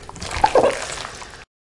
Pumpmkin Guts Squish 2
Pumpkin Guts Squish
guts, squish, pumpkin